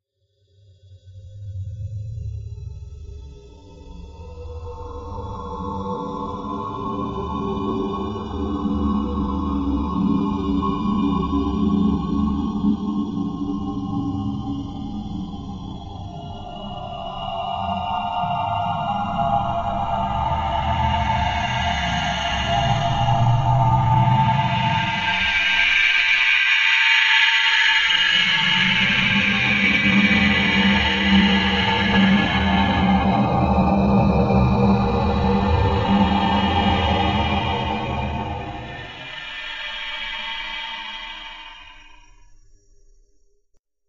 Alien Sound 1
I created these using just my voice recorded with my laptop mic and wavpad sound editor. I needed some alien type sounds for a recent project so I created these. Enjoy!
Alien-Beam-Transport, Alien-Hyperdimensional-Drive, Alien-Power-Surge